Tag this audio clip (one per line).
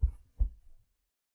footstep
foot
step
walking
running
walk
footsteps
feet
steps